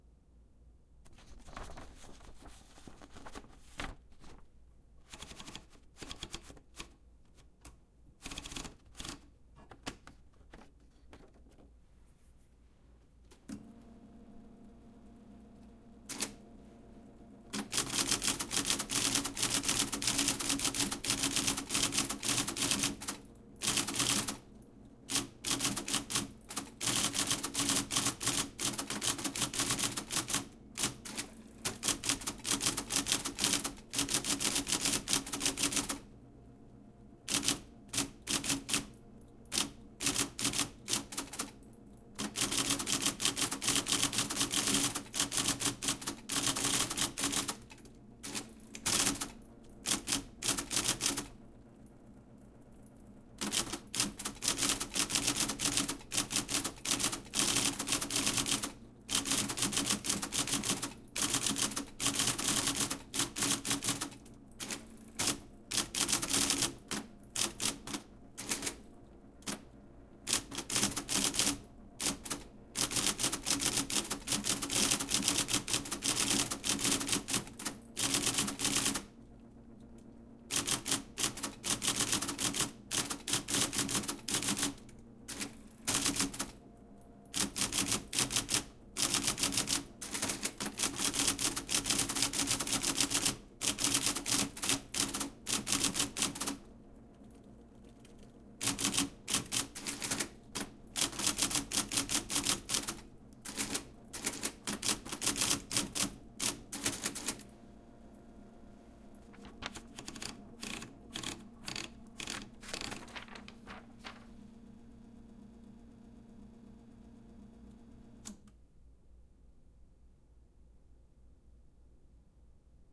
Typewriter, IBM Selectric II
I was surprised this still worked. This is an old IBM Selectric II typewriter, with correcting tape, the “quieter” Selectric at the time. It’s about 80 pounds, a real back-breaker. The carriage return bell is broken, unfortunately, so the best you might hear it is rattling due to the belt vibration.
Recorded with a TASCAM DR-05, without the low-cut since I wanted a beefy sound. Placed direcly above the roller, about 12 inches away.
Description: I roll in some paper, type a few paragraphs from some copy, and roll it out when I’m done. Man, can you type fast on these machines!
IBM, antique, old, hum, machine, mechanical, sound-museum, typewriter, electric, selectric, writing